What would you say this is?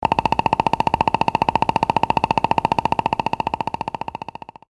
bumbling around with the KC2